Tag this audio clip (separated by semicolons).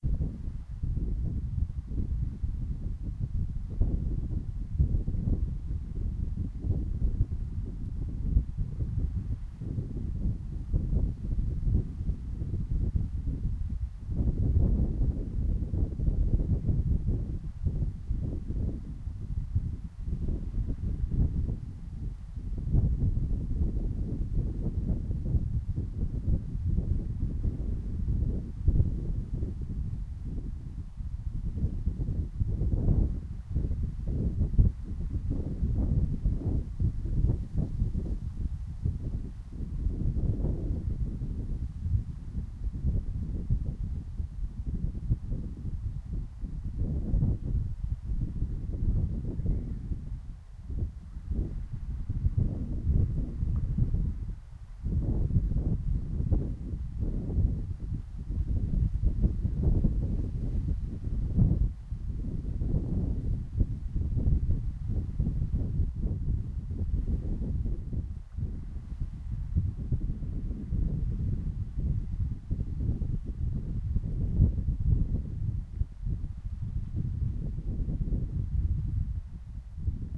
background; bad; clipping; cracking; crappy; editing; microphone; noise; whoosh; wind; windy